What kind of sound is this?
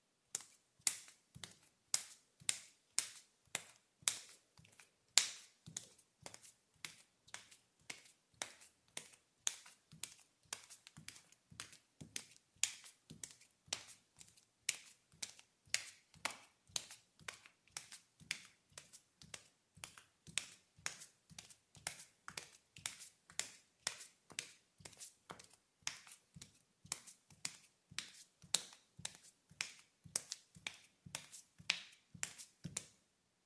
01-10 Footsteps, Tile, Male Barefoot, Slow Pace
Barefoot walking on tile (slow)
linoleum, slow, tile